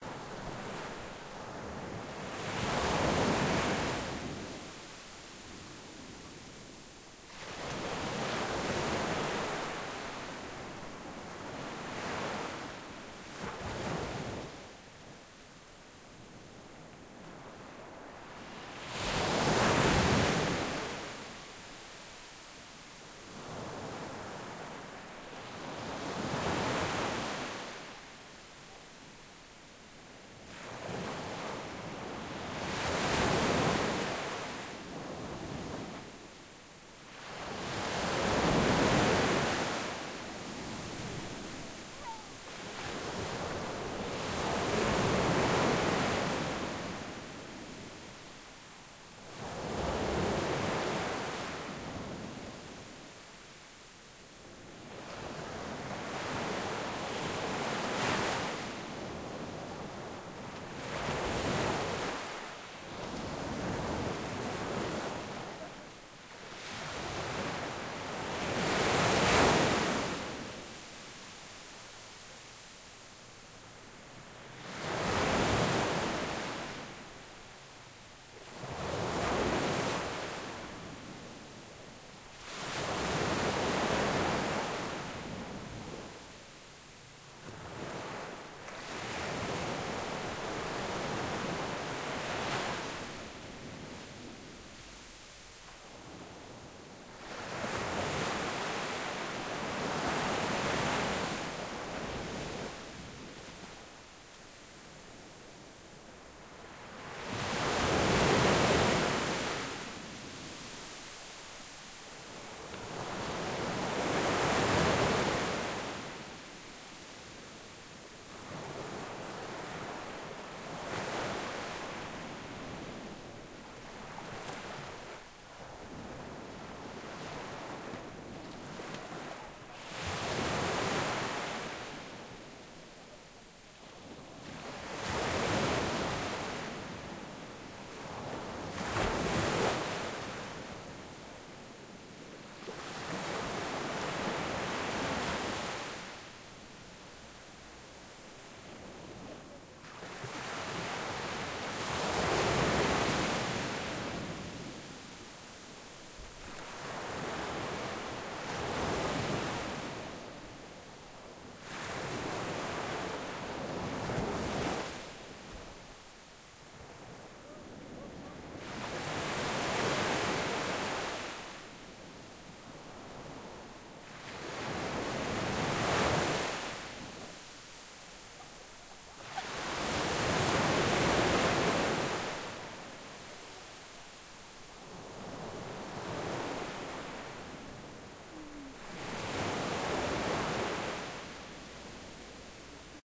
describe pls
mar llafranc close perspective sea waves
perspective, close, waves, sea, mar